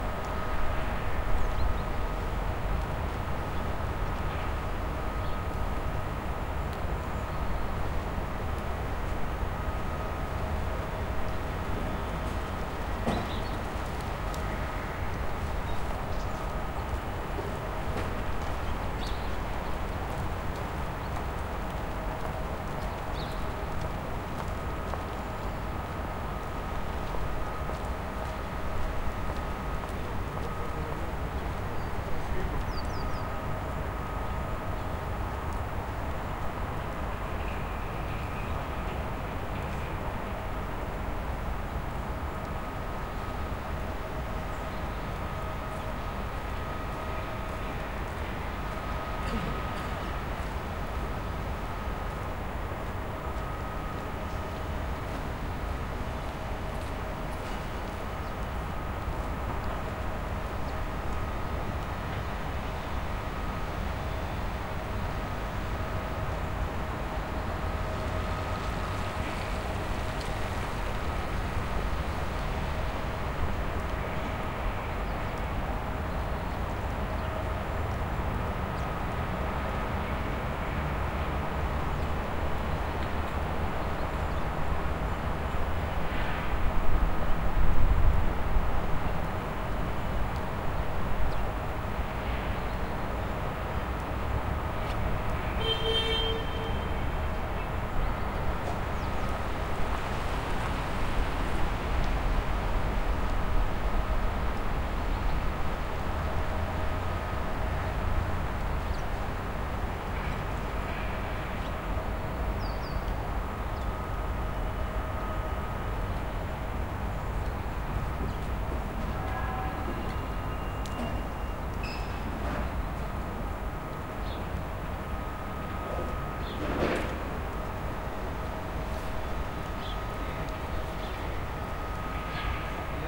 Noise at Novosibirsk center. Recorded in the city square in the center of Novosibirsk. Sound of car klaxon.
Recorded: 2013-11-20
XY-stereo.
Recorder: Tascam DR-40
ambiance, ambience, ambient, atmosphere, car-klaxon, city, field-recording, noise, people, rumble, soundscape, street, town